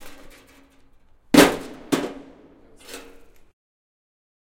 Recorded in an abandoned factory in Dublin.
bang, clang, crash, factory, industrial, metal, noise